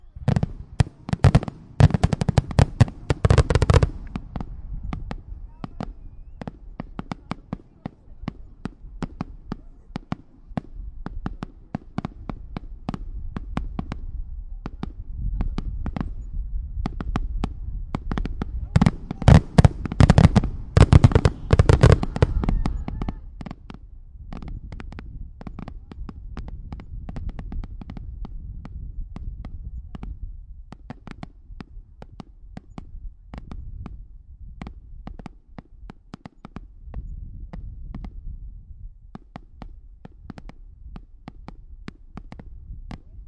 Fireworks-2017-Finale
This is the finale from a July 4th fireworks show in northern california.
fire-crackers, fire-works, firecrackers, fireworks, fourth-of-july